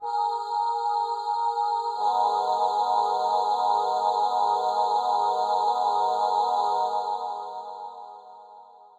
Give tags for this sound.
choir; chord; Zynaddsubfx

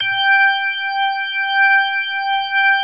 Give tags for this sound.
organ; rock; sound

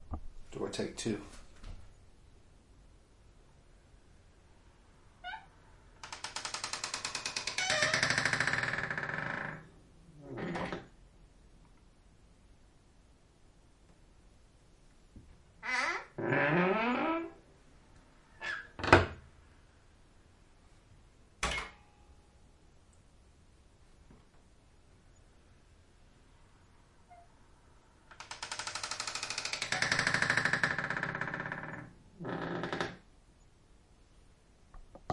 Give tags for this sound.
Creak; Closing; Open; Squeaking; Close; Door; Squeak; Creepy; Wood; House; Wooden; Old

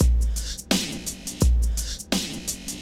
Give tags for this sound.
drum; beat; idm; downtempo

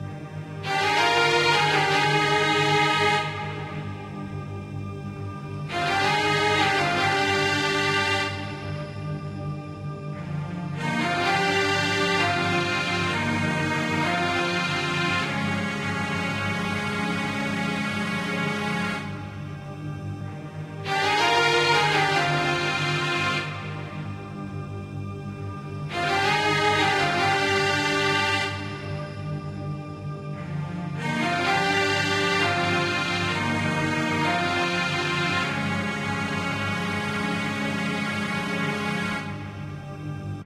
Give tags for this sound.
ambiance; atmospheric; epic; grand; high; mystical; piano; zelda

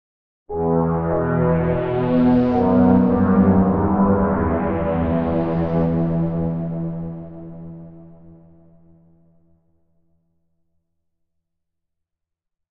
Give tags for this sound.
pad; strings; suspense

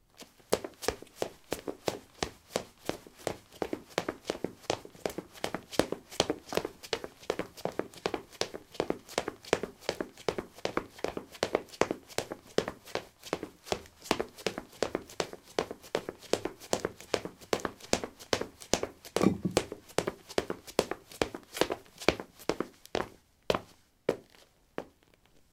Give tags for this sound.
footstep; footsteps; run; running; step; steps

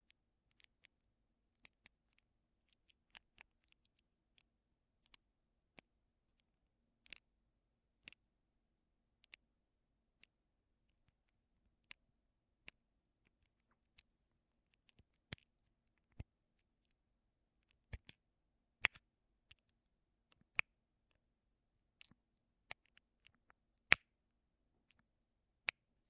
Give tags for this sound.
button; out; interface; slide; command; switches; off; interaction; click; buttons; switch; input; slider

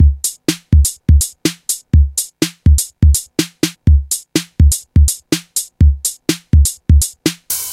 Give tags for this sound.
beat; drumloop; drums

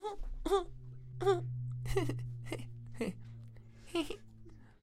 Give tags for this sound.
female; foley

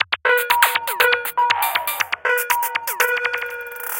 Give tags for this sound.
granular; 120BPM; loop; electronic; drumloop; rhythmic; dance; electro; beat

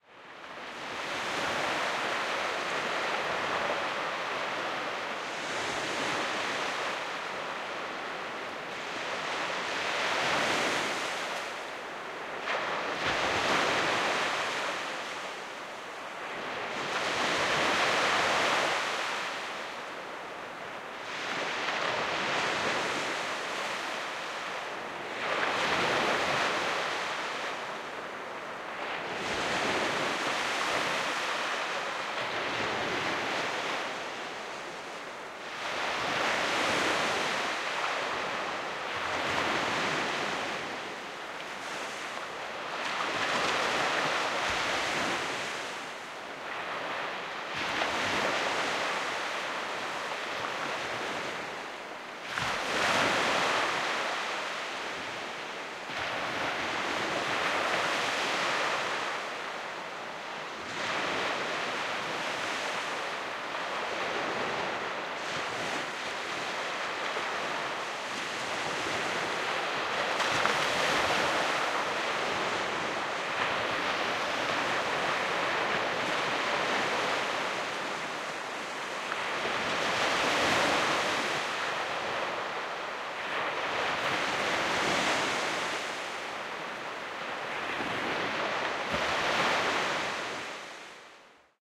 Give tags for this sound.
night; nature; sea; waves; Baltic; water; beach; field-recording; Niechorze; Poland

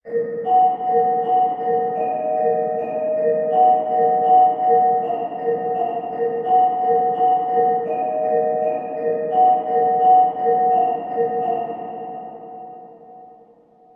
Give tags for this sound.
MELACHOLY; SOUNDTRACK; HORROR; SAD; MORBID; DRAMA

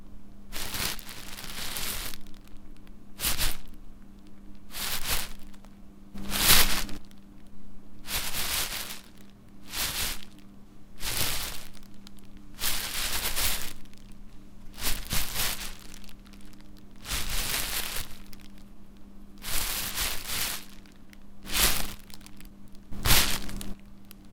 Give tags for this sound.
garbage; plastic-bags; rustling; trash; trash-can

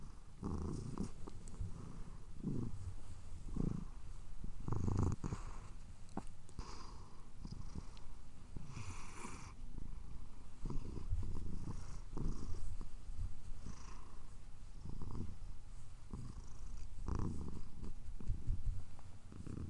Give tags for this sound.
cat; purring; purr

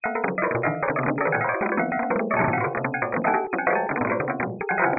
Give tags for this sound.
synthesizer; synth; jsyd; syd

